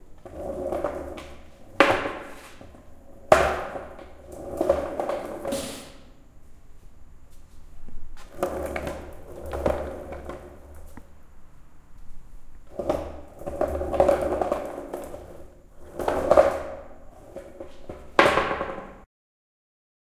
Skateboard rolling on tiles indoors